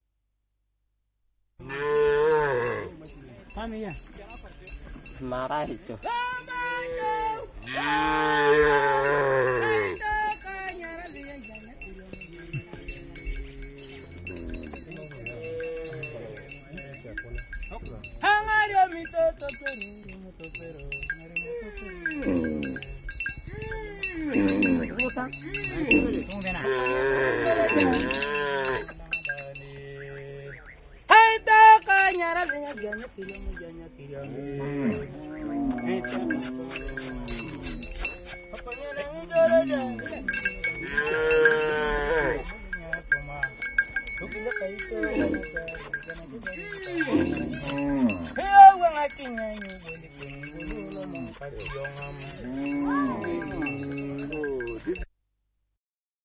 Cattle-song-southsudan
Recorded on Sony MD. South Sudan. Kidepo area. Among cattle herders. Song celebrates the cow (could be in Didinga, local tribe - I didn't ask!
field-recording, ambient, Cattle, ambiance, Sudan, song, South